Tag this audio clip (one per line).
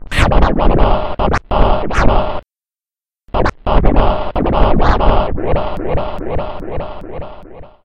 turntables
scratch